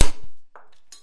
ruler break
I broke a plastic ruler trying to make other sounds with it.
effect, fx, sfx, soundeffect